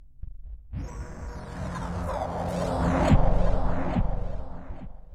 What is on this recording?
Fast whoosh with electronic gritty edge.